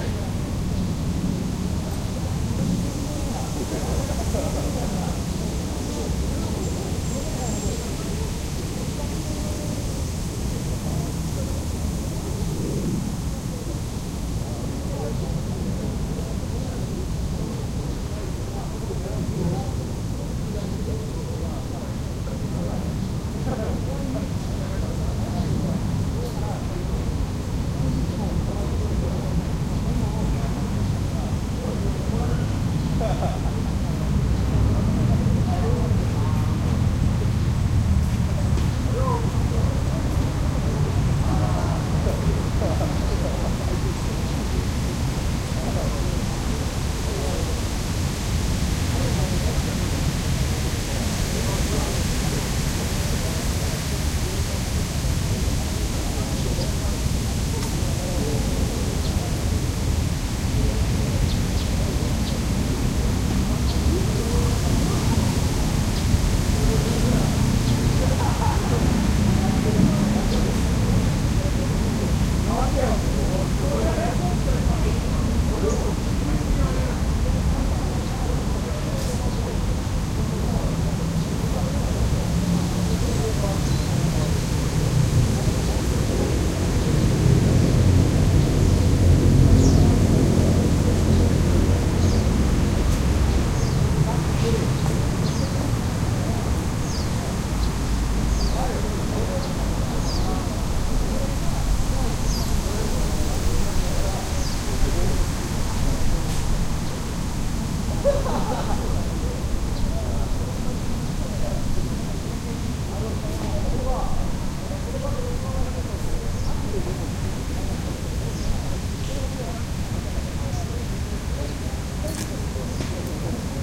tokyo park at dusk
A small park in Higashi-Koenji, Tokyo at dusk. There are some university aged kids drinking and smoking in the background. I wanted to record the fountain sound as well, but the fountain had already been turned off. You can hear some bird, rustling of the wind in the trees and traffic in the distance. Recorded with a pair of mics at 120 degrees.
ambience, background, birds, cityscape, dusk, field-recording, garden, japan, japanese, japanese-language, park, people, public-park, tokyo, traffic, trees